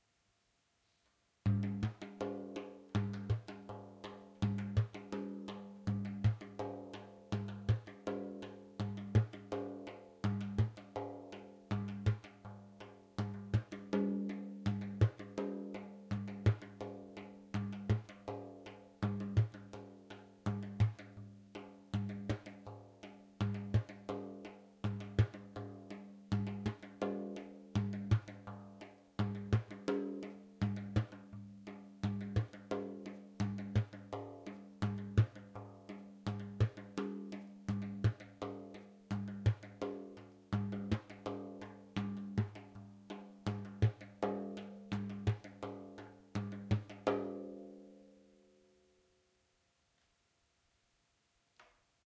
bendir rhyhm-1
A simple rhythm on the bendir. Recorded with Zoom H2n and Sennheiser mic. No editing, no added effects. Might be useful as an fx.
The money will help to maintain the website:
bendir, drum, framedrum, rhythm